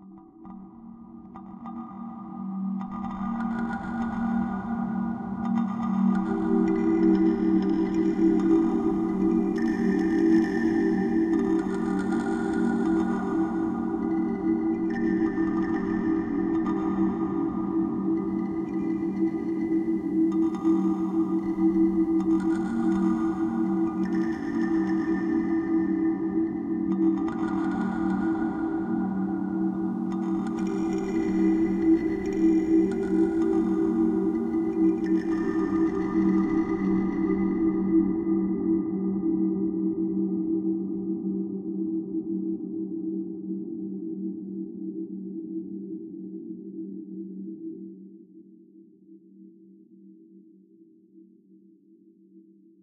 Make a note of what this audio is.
Kalimba Atmosphere
sampled my kalimba & stretched the sound
used tool: FL Studio